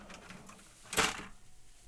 16. Cash-register closing
Closing a cash-register
box, cash, register